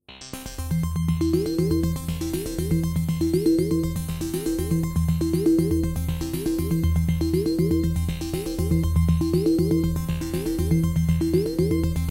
Made on a Waldorf Q rack
120bpm, beep, synthesizer